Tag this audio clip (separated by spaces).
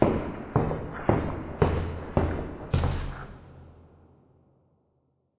concrete footsteps walking foot steps walk feet